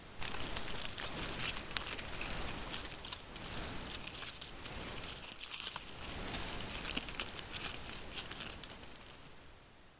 BELLEUDY Cosima 2020 2021 Fire
This sound is a modulated pink sound added to a recorded sound.
I recorded myself scrunching aluminum paper to create the sparkling fire and modulated it.